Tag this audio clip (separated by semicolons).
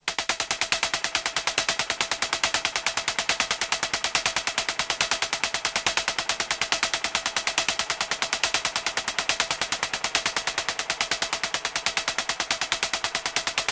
analog; percussion; spring; synth